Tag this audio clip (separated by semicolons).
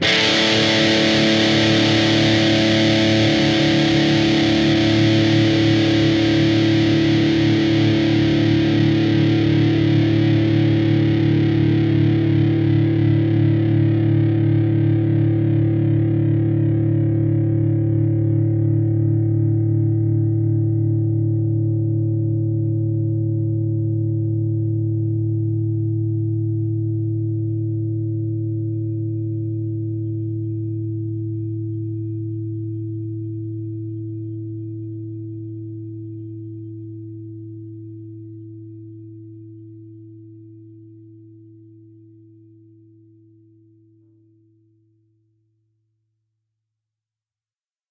chords; distorted; distorted-guitar; distortion; guitar; guitar-chords; rhythm; rhythm-guitar